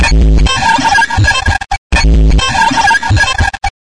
1stPack=NG#2
deconstruction, glitch, lo-fi, loud, noise